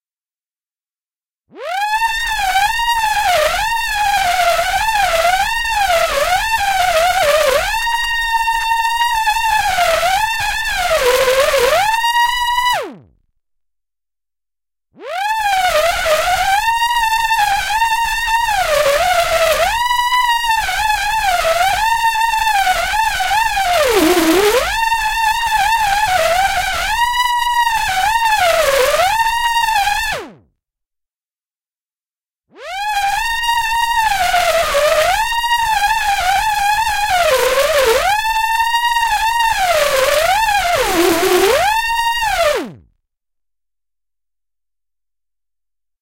Just the output from an analog box circuit I played with for only half an hour or so to try to get the overall feeling of how it sounds when the dentist is drilling into your head. This one had a few more minutes of tweaking to the circuit, versus DentalDrill (the first uploaded variant). The grinding component is modulated by the depth of the tone dips, making it sound just a wee bit more like the real thing. NOT A REAL RECORDING. Why? Well, it was based on a discussion on the forum where no one seemed to be suggesting anything that filled the void.